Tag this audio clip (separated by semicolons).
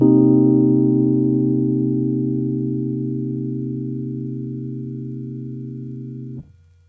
cminor; c; rhodes; chord; jazz